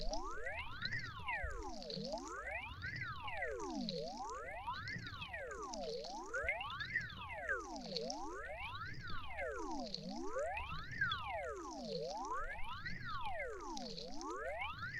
Stream with Phaser
A recording of a steam using the Zoom H6 with the included XY mic and a Shure SM58 with a phaser effect just for fun.